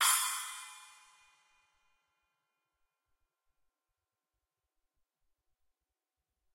8" paiste pst5 splash cymbal recorded with h4n as overhead and a homemade kick mic.